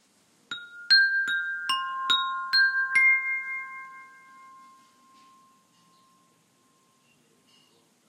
little chimes
Some xylophone thing I've had since I was a kid